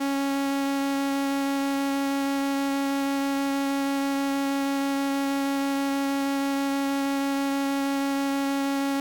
Transistor Organ Violin - C#4
Sample of an old combo organ set to its "Violin" setting.
Recorded with a DI-Box and a RME Babyface using Cubase.
Have fun!
70s, analog, analogue, combo-organ, electric-organ, electronic-organ, raw, sample, string-emulation, strings, transistor-organ, vibrato, vintage